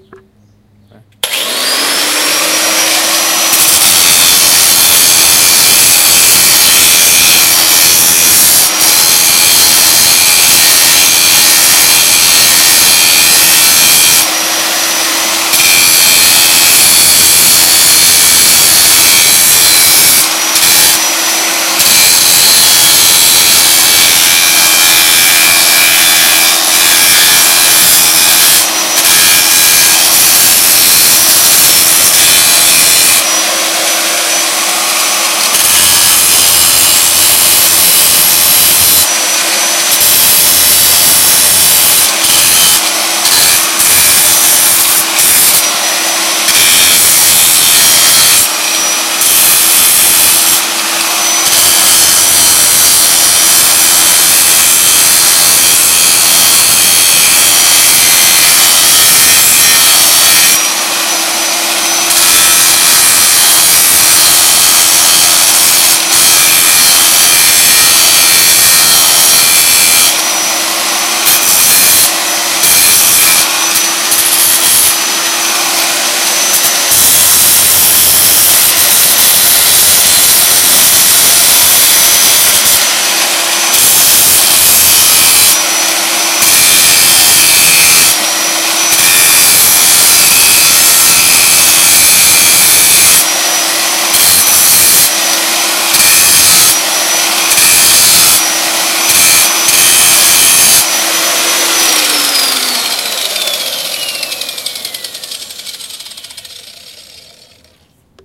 lixadeira elétrica
lixadeira, mquina, motor